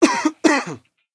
DB COUGH 001
A small but rough cough captured during the recording of an acoustic guitar track.